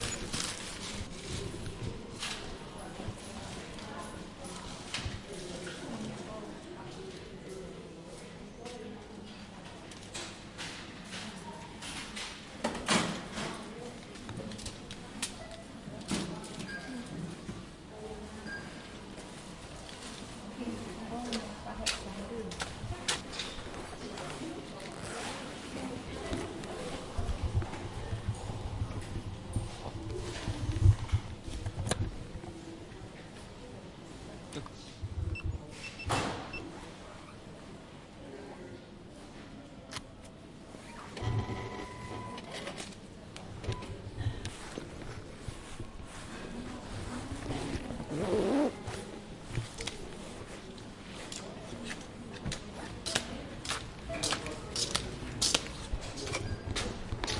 Finnish alcohol store - cash register recorded with zoom h2n and edited with audacity. Place: Riihimaki - Finland date: year 2013
alcoloh-store, cash-register, interior